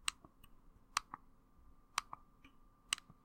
Small Button Press
Pressing a small button.
small, press, button